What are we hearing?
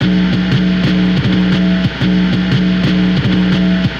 DJB 21 loop
Some Djembe samples distorted